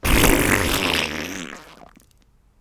Everybody has to try their hand at making fart noises. Recorded using a Blue Yeti Microphone through Audacity. No-post processing. As can probably be guessed, I made it using my mouth. Fart power for a cartoon character as they blast off maybe?